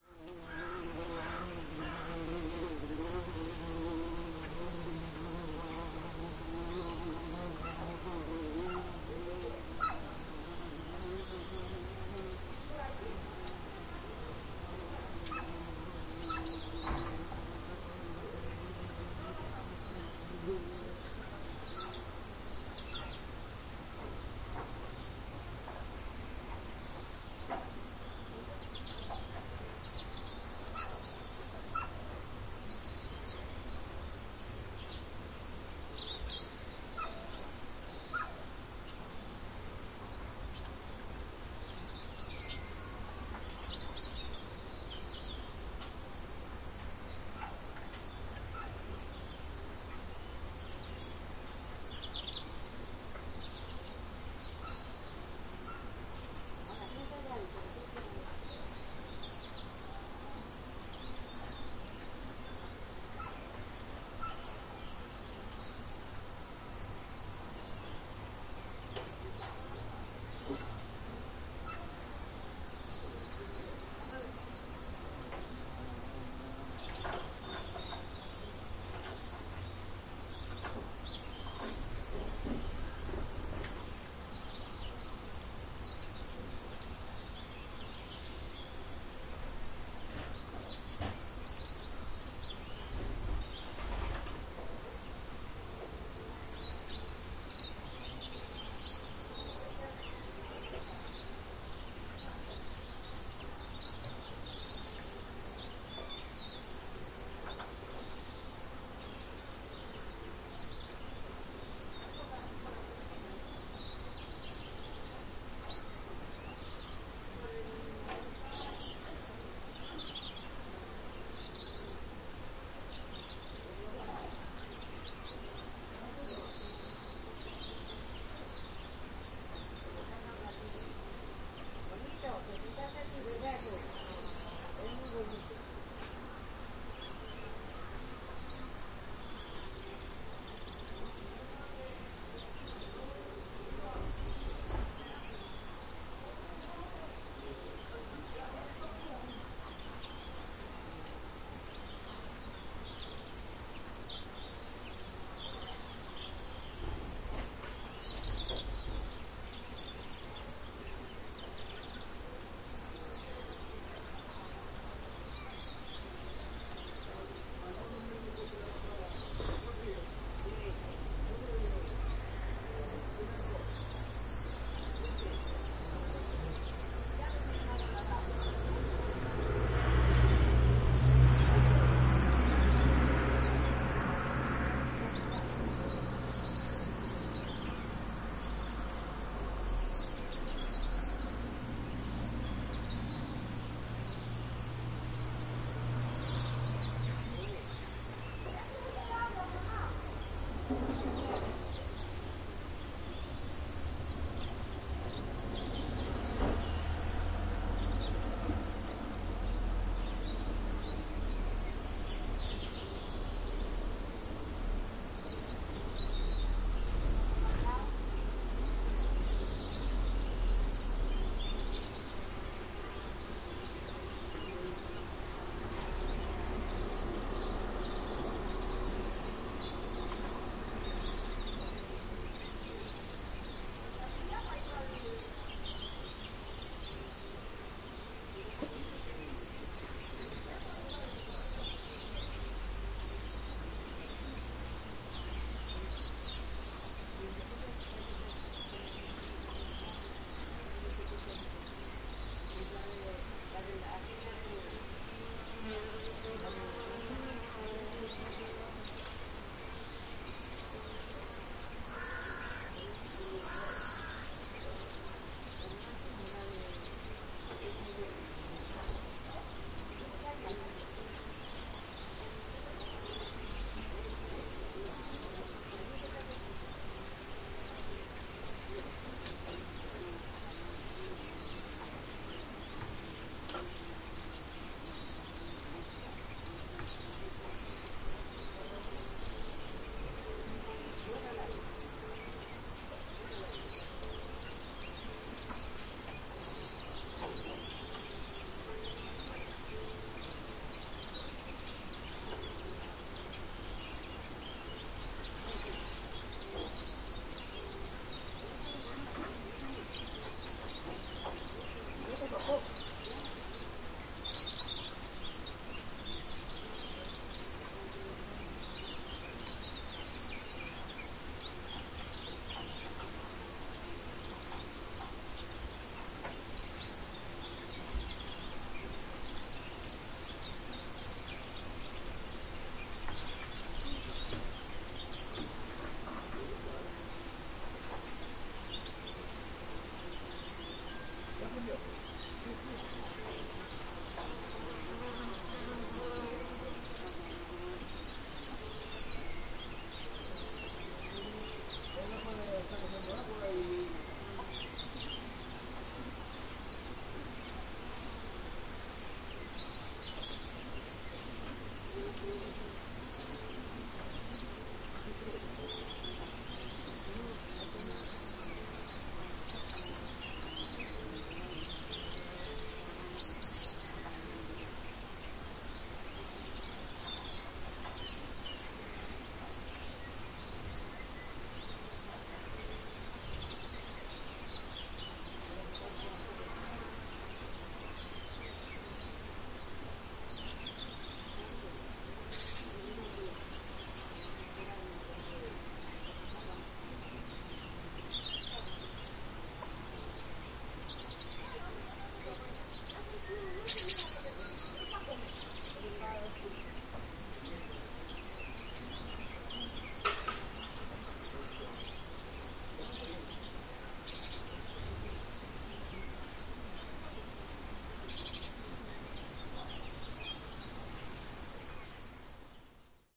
Binaural soundscape recording in Ozuela, El Bierzo, Spain.
Recorded with a Soundfield SPS200 microphone, Aeta 4Minx recorder, and convert to binaural with Harpex-B
ambiance, ambience, ambient, atmos, atmosphere, background, background-sound, Bierzo, birds, car, field-recording, forrest, mountain, nature, small-village, soundscape